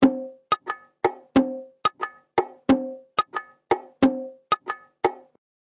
JV bongo loops for ya 3!
Closed micking, small condenser mics and transient modulator (a simple optical compressor he made) to obtain a 'congatronic' flair. Bongotronic for ya!
bongo, congatronics, loops, samples, tribal, Unorthodox